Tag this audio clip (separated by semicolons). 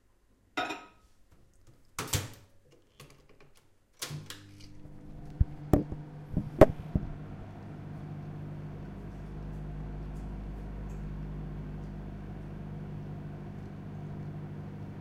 Kitchen; Warming